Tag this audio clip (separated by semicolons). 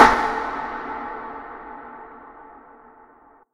reverb; drum